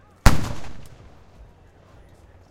civl war canon fire
canon being fired from civil war reenactment
blast, civil, war